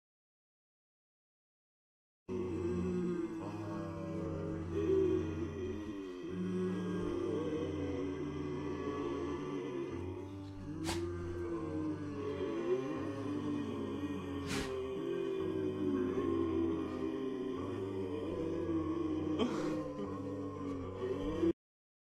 Multiple people pretending to be zombies, uneffected.